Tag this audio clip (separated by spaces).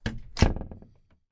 shut door slam close closing wooden